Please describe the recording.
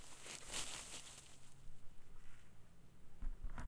a tree bouncing up and down
plants, movement, tree